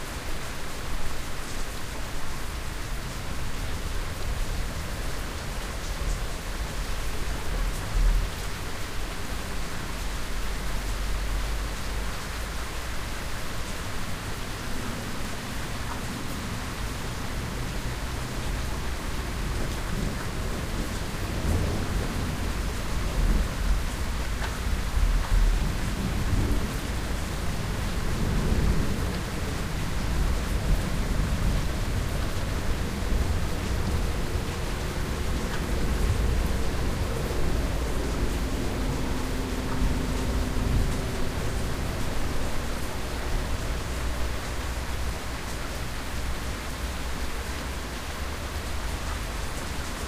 About a minute of heavy rain, recorded from the second floor window of a town house about half-a-mile from a small airport.